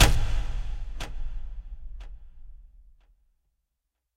big switch flip
button, click, switch